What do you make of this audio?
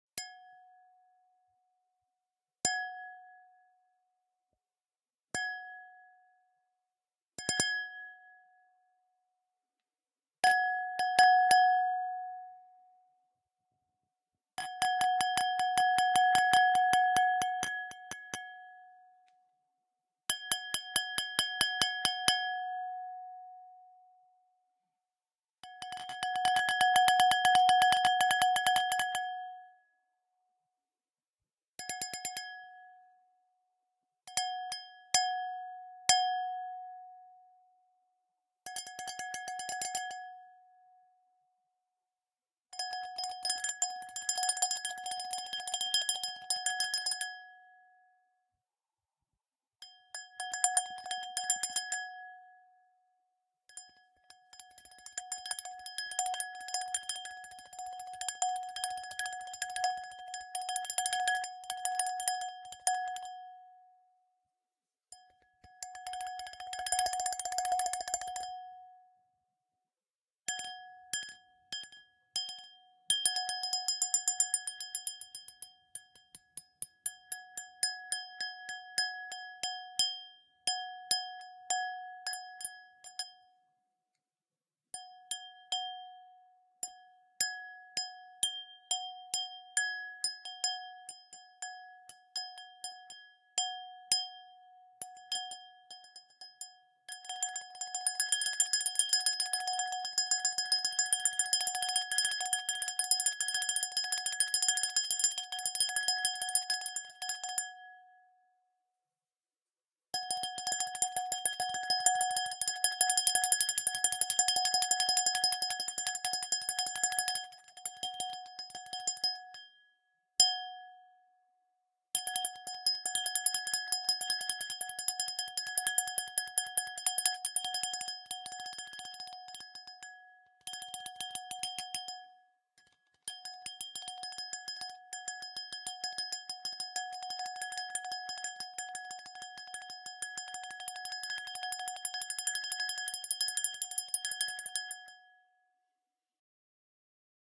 wine glass spoon wooden stick
Sounds of a wine glass being hit with a steel spoon and wooden stick, single hits and repetitive fast hits for imitating a bell of sorts. Going into nice overtones in the second part. CAUTION: may get noisy and ear-piercing ;)
I recorded this for my own sound design purposes (game SFX) and thought I would share it with anyone who may find it useful - if you do, please help yourself and enjoy!